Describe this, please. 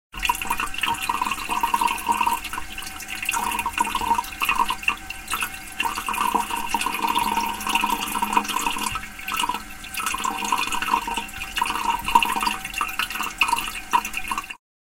water in wc or piss
brook, or, piss, small, water